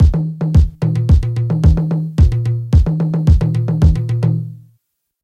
a Balearic disco electronic drum-loop. Inspired by the Happy Mondays.
made on Direct wave (a multi-sampler VST plugin by Image Line ), running FL Studio as a host.